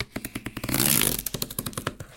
Queneau carton Plus moins rapide 01
grattement sur un carton alveolé